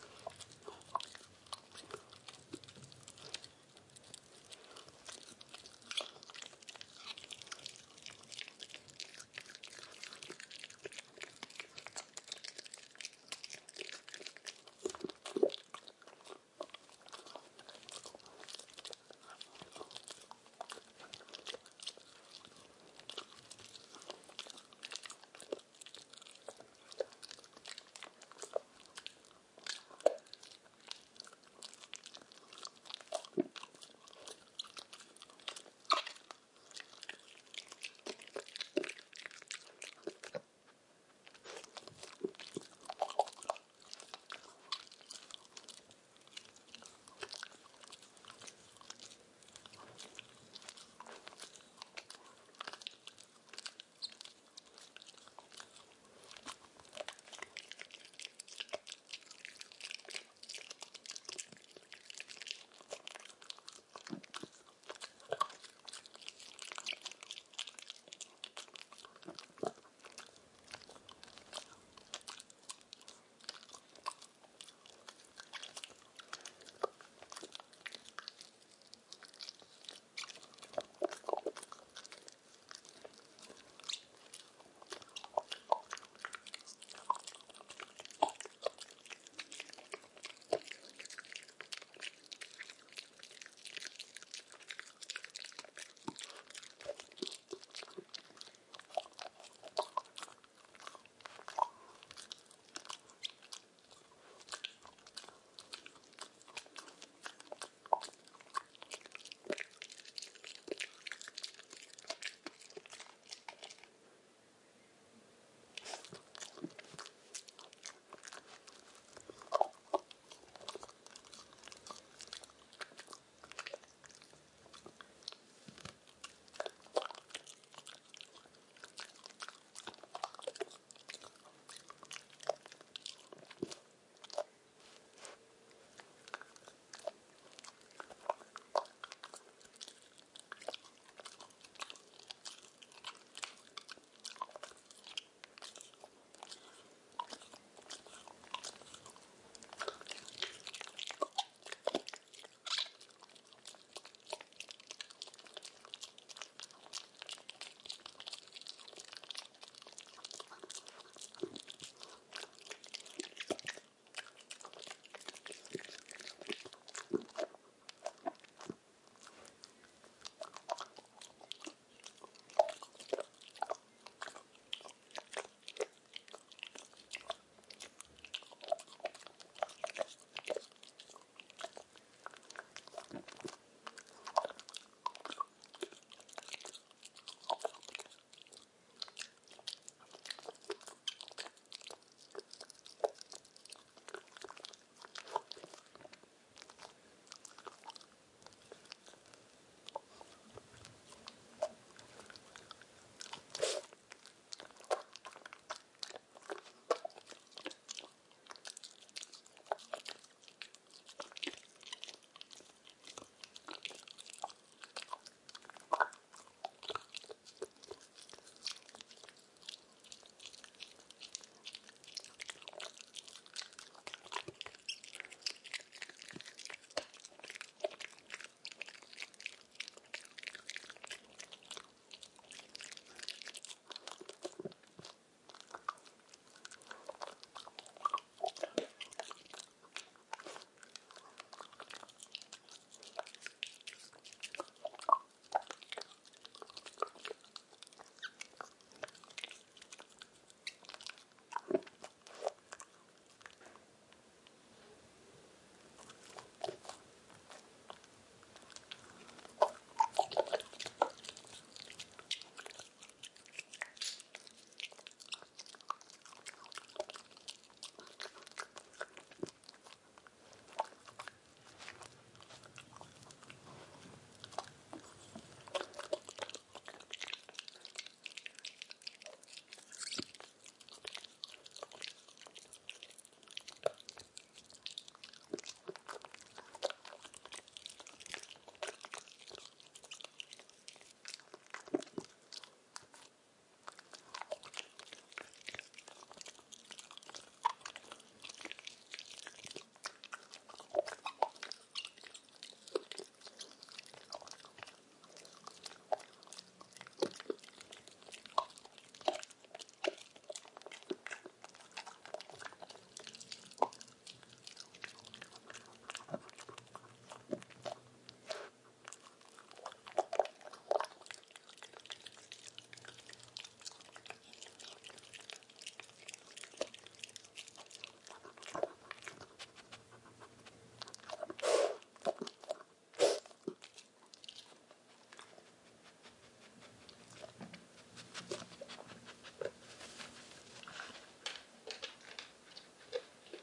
Recorded my dog chewing a dental treat.
Signal Flow:
Zoom H6 > Rode NTG2 (phantom power provided by H6)
Mic placed approximately 7 inches from mouth
Audio has been processed to remove hum/noise
munching, small-dog, asmr, eating, chewing, munch, dental-treat